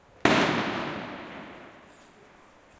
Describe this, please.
single firecracker / un cohete